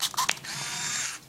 MinoltaV300Picture1
Click! I take a picture with a Minolta Vectis-300 APS film camera. Clicking of the shutter and then the film winds. There are several different sounds in this series, some clicks, some zoom noises.
film-camera, click, minolta-vectis, camera-click, APS, photography, zoom, film, photo, shutter, minolta, vectis, servo, vectis-300, focus, camera